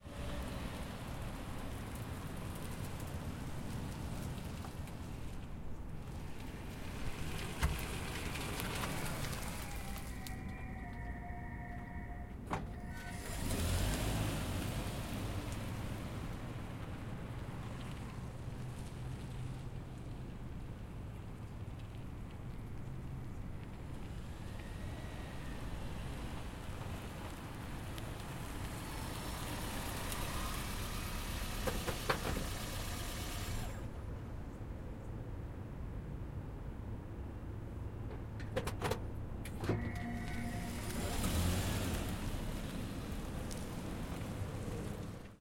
Exterior Prius In Stop Away series tk2
Toyota Prius C multiple in stop and away. Lots of good hybrid/electrical and engine auto stops.
Hybrid, Prius, Toyota